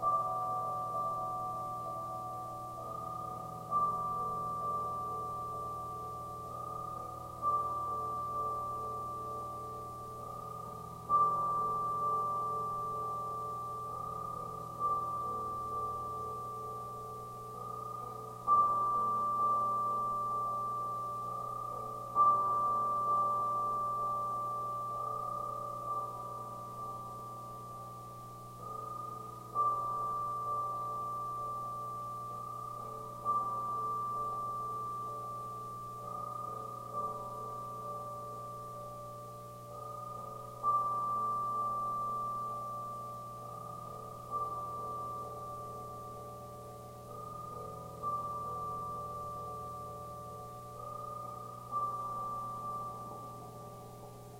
This file is a residua result of a song I made a long time ago. I don't know where the original song files are but I've always kept these sound bites. I think I used a old timey radio looking shure condenser mic, mackie mixer, and a mac. This is a softer portion of the clip.
delay, effects, guitar, line6, soft